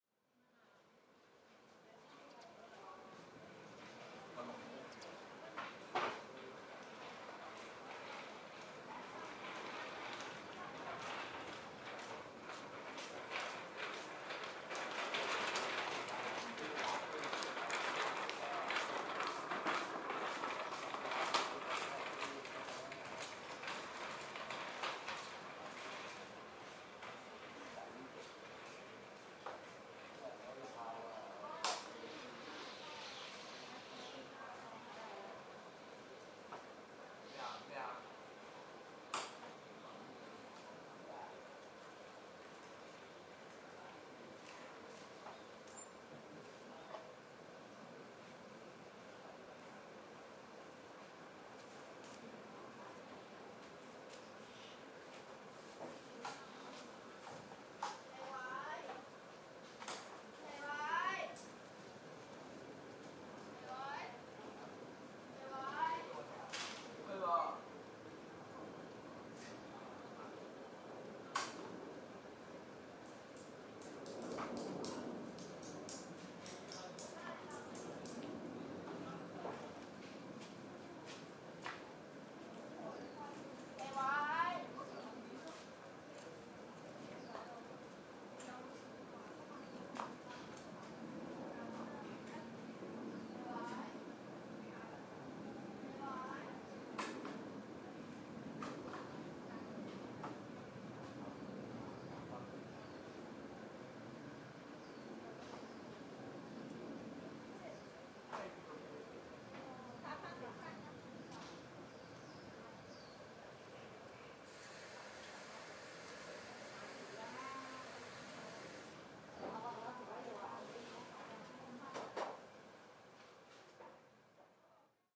Tai O general amb
Stereo recording of a dog bark in Tai O, Hong Kong. Tai O is a small fishing village. It is famous for a very special life style, people living in some huts that built over a small river, just a little like in Venice. Recorded on an iPod Touch 2nd generation using Retro Recorder with Alesis ProTrack.
1, ambiance, hong-kong